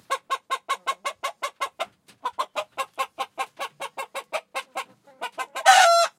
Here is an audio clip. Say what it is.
hen clucking. PCM M10 internal mics, recorded near Utiaca, Gran Canaria
field-recording, henhouse